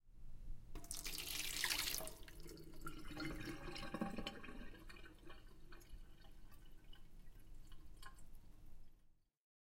Water, pouring into sink drain
A glass of water being poured into the sink.
pouring, Water, foley, drain, sink